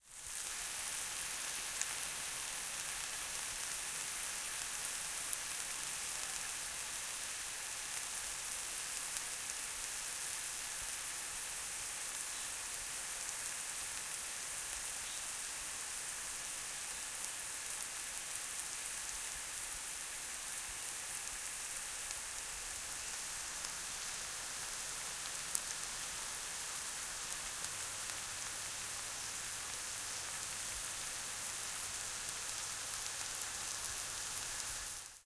A frying pan full of mushrooms sizling away.
You can unfortunately... or fortunately depending on what you think... hear a bird in the background too!
Recorded during the Covid-19 lockdown in South Africa on a Tascam DR-07.
sizzle, cook, breakfast, fry, pan, kitchen, food, field-recording